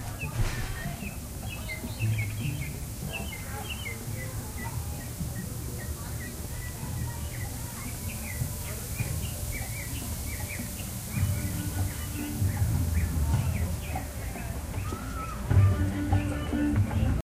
zoo amazonwalk
Walking through the Miami Metro Zoo with Olympus DS-40 and Sony ECMDS70P. Walking through the Amazon exhibit.
animals, field-recording, zoo